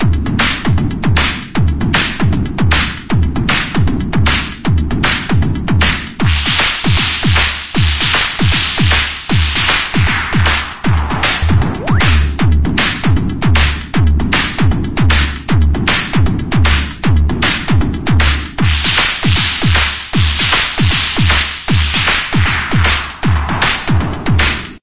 Skipper Beat 155bpm
Swinging beat with Distortion and and High pass filter in sections